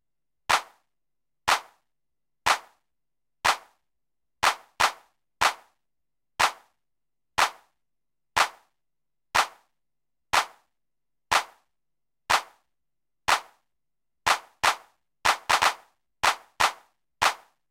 jomox clap
clap from a jomox 999
analog, drum-loop, claps, house